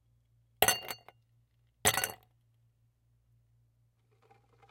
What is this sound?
Slowly dropping ice into glass one by one (2x), ice clanking against glass